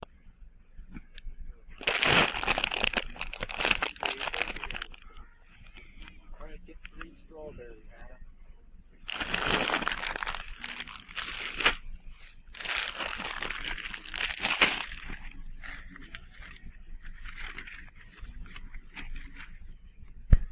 Store Crinkling Bag4
cooling, clink, clunk, produce, crinkle, ambience, can, checkout, food, store